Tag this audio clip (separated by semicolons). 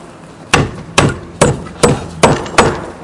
metal; hit; wood